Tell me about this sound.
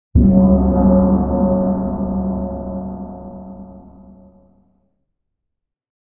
Magyar gong
Based on my basic BOSS gong. Cloned and EQed in many parallel channels with small alterations (regional deletions and changes in volume).
The file is phased by doubling a 12 ms region at the middle of the attack only of the left channel; that way the right channel has more punch with a briefer attack.
Here is the original soundfile which has higher frequencies, especially a little bit after the attack.
anti-Buddhism, anti-Shinto, atheism, crash, drum, filmscore, foley, gamescore, gong, gong-sound, gowlermusic, hit, Hungarian, Hungary, impact, impersonhood, industrial, Leonard-Susskind, magyar, metal, metallic, percussion, percussive, single-impact, synth